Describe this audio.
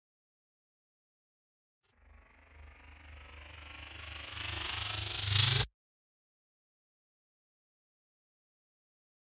Noise Swell 1 NO FX
effect, fx, noise